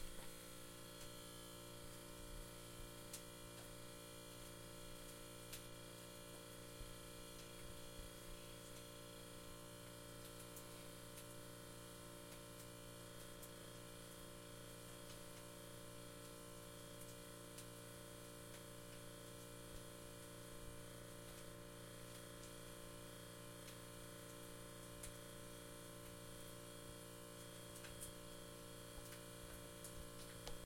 Fridge Hum
This is the hum of my fridge, which is very loud and annoying.
buzz, fridge, hum